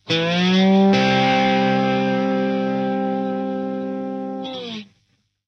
Slides-Slide C Slow-1
Guitar slide c 5 chord slow slide.
Recorded by Andy Drudy.
Seaford East Sussex - Home Studio.
Software - Sonar Platinum
Stereo using MOTU 828Mk 3 SM57 and SM68
Start into a Marshall TSL1000
Date 20th Nov - 2015
c, chord, Guitar, slide